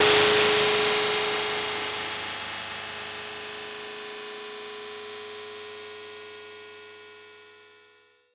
fm7cymb
Synthesizer drumkit produced in Native Instruments FM7 software.
cymbal, electronic, fm, nativeinstruments